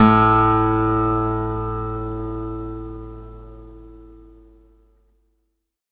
Sampling of my electro acoustic guitar Sherwood SH887 three octaves and five velocity levels
acoustic, guitar, multisample